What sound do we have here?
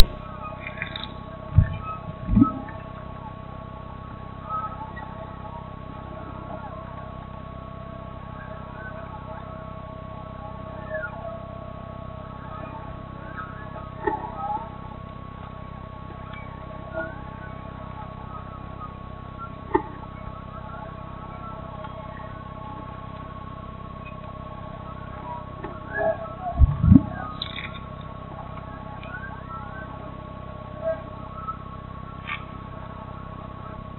alien female and animals voices modulations and sound effects 01
alien female and animals voices modulations and sound effects fragment 01
electronic algorithmic sonic objects
alien, alien-female, alien-voice-modulation